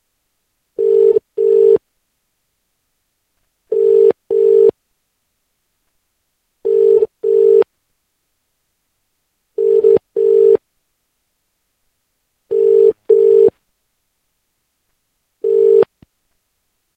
A British call tone recorded directly from phone.